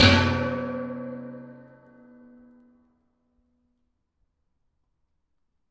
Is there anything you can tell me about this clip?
One of a pack of sounds, recorded in an abandoned industrial complex.
Recorded with a Zoom H2.
field-recording, city, clean, metallic, high-quality, percussion, metal, industrial, urban, percussive